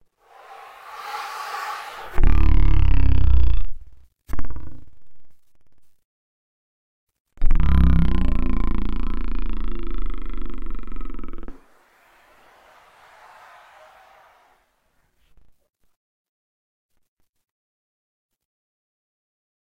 Bigger time stretch of the words Sub Bass using L.P.C.